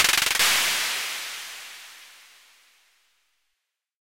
Tonic Rattling
This is a rattling noise sample. It was created using the electronic VST instrument Micro Tonic from Sonic Charge. Ideal for constructing electronic drumloops...